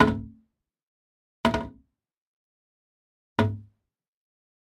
Close Toiletseat
Tested out my new Zoom F8 with a Slate Digital ML-2 Cardiod Smallcondenser-Mic. I decided to record different sounds in my Bathroom. The Room is really small and not good sounding but in the end i really like the results. Cheers Julius